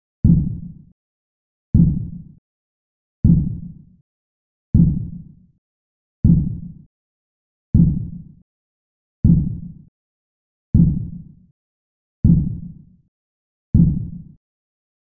My theme is “elements & technology”, this is important because each of these sounds represents Humanities impact on the elements.
All four of my samples have been created on Ableton’s FM synth Operator.
There are zero signal processors used after the initial FM synthesis.
I thought that this is fitting since my theme is specifically about humanities effect on nature. Now for the specific description:
This is the sound of a piledriver banging away into the ground from inside the EARTH. This is the "through rock" perspective of that sound.
Remember: This is completely from an FM synth.
pounding, synth, pound, earth, pile, synthesized